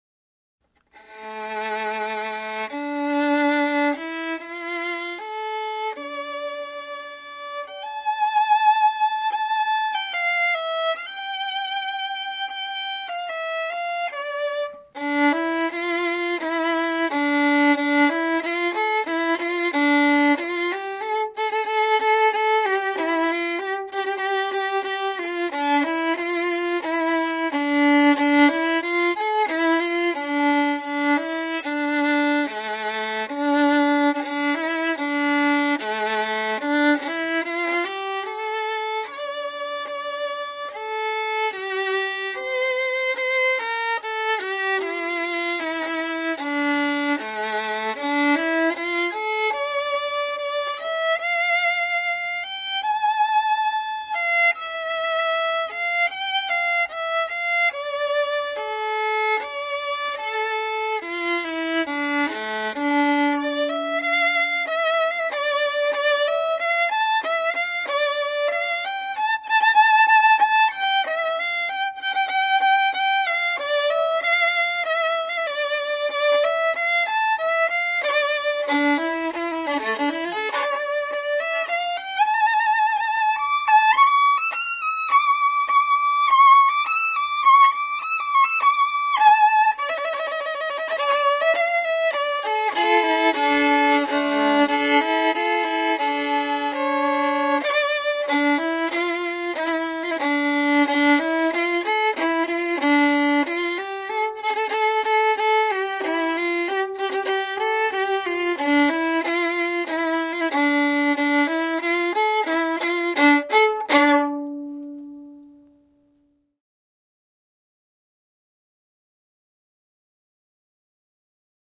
hoochie violin variation
Violin solo. A short impression of "The Streets of Cairo" combined with the variations (as heard in "gypsy violin". Played by Howard Geisel. Recorded with Sony ECM-99 stereo microphone to SonyMD (MZ-N707).
hoochie-coochie, music, music-hall, solo, violin